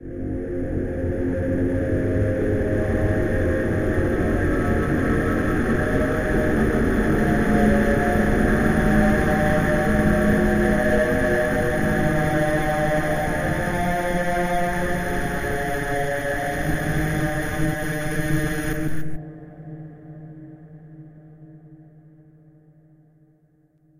Over processed. Swelling and dying drone. Faint melody in the background.